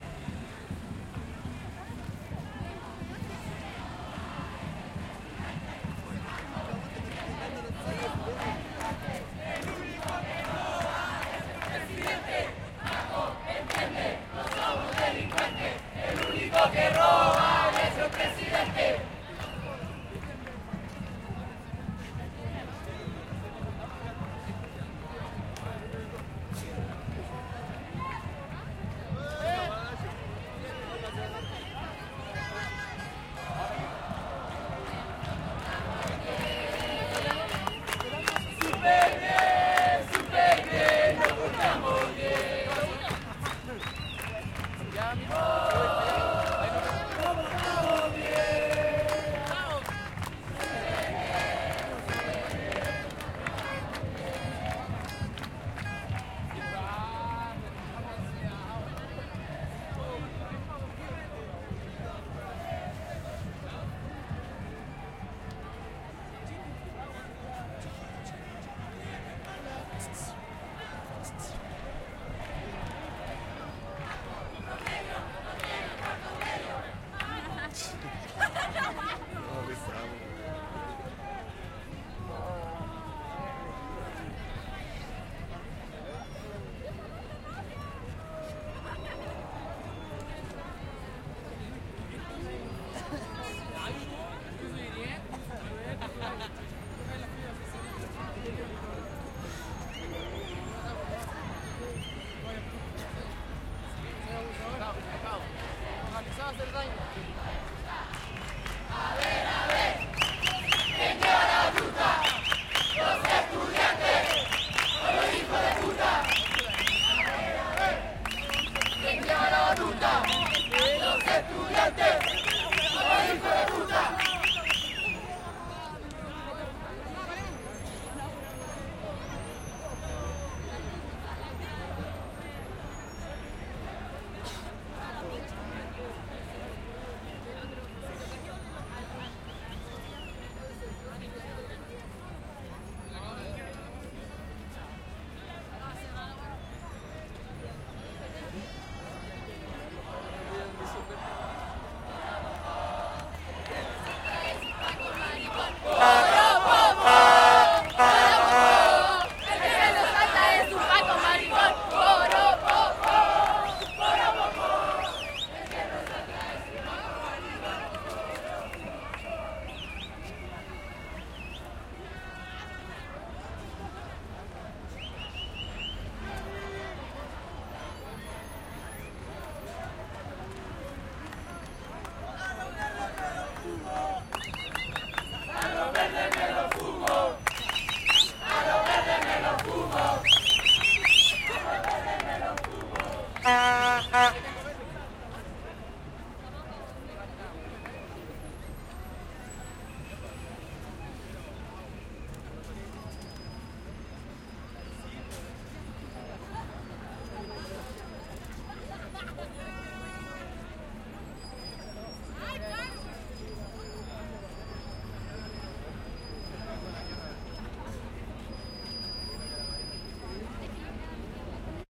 Marcha estudiantil 14 julio - 12 ultimos gritos
últimos gritos.
Paco, entiende, no somos delincuentes,
el unico que roba es el presidente.
Ohh, nos portamos bien, super bien
a ver a ver quien lleva la batuta.
poropopo,
a los verdes me los fumo
santiago, nacional, exterior, lejos, people, calle, crowd, marcha, gritos, paro, protesta, protest, gente, chile, strike